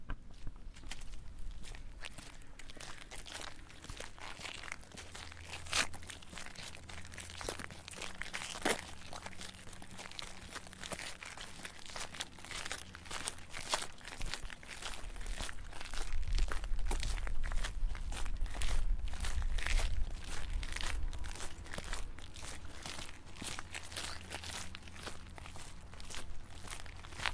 Two People Walking Along a Gravel Road
Just a quick recording of me and a friend walking along a gravel road. Recorded with a ZOOM H4N recorder in stereo.